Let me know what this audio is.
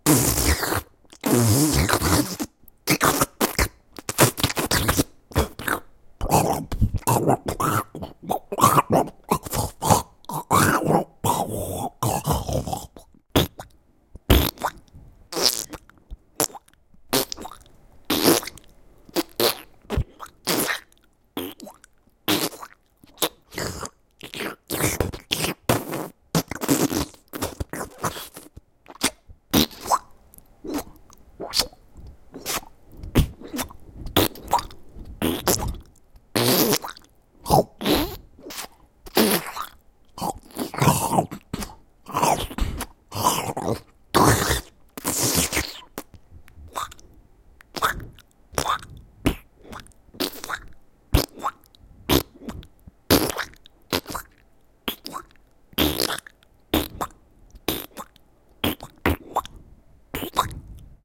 Icky Worm Slime Monster
Slime monster sounds. Made with my mouth.
Recorded with Zoom H2. Edited with Audacity.
alien, creature, drip, glibber, gooky, growl, liquid, monster, mouth, mucoid, mucus, roar, saliva, slime, slimy, slow, spit, suck, worm, worms